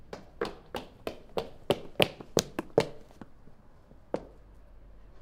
woman running on pavement stops hiheels 3
footsteps, pavement